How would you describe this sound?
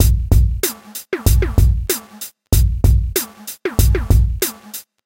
drum loop with big distorted rasta bass drum beat and panned syndrum sounds. actually 95.05 bpm. 2003
breakbeats, iyabingi, drum-loops, drums, 95, loops, bpm, breaks